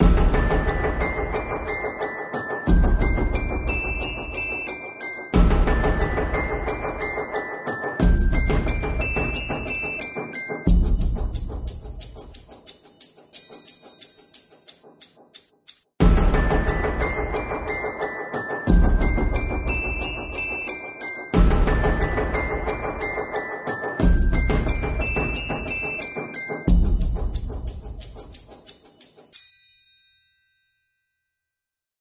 EXCERPT 2 (percussion, glockenspiel, triangle)
glockenspiel, percussion, triangle